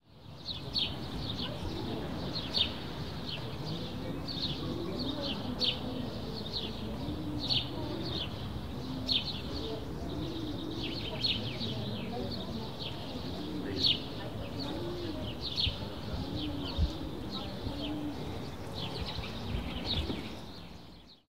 atmosphere-sunny-day-birds
Ambience of field of grass far form road. High noon, sunny day. Sounds of birds and hum of road far away. Recorded on Zoom H4n using RØDE NTG2 Microphone. No post processing.